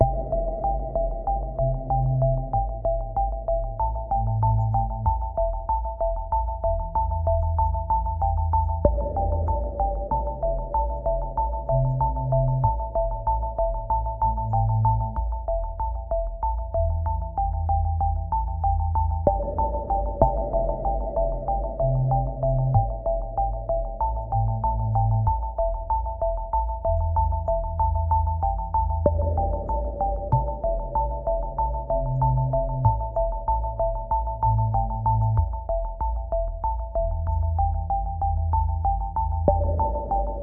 electronic-music-loop-001-Accurate time.
electronic music loop
ableton and massive sounds
music, synth, ableton, loop, electro, ambient, atmosphere, electronic, rhythmic, live